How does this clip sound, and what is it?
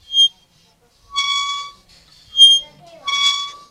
playing with metal fountain
juganndo con una fuente